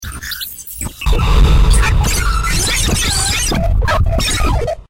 sound-design created with a lot of processing of various samples in Native Instruments Reaktor